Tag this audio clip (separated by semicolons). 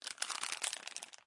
click; gum; Silver; wrapping